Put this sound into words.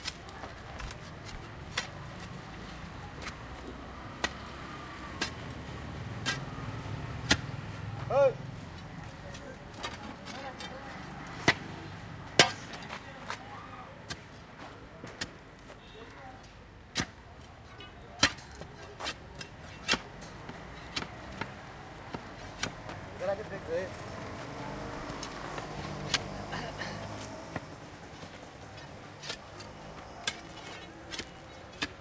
Labour work in Road Mumbai
Sounds recorded from roads of Mumbai.